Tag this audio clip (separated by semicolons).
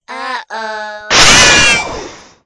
explosion; uh; oh; uh-oh; yell; two-people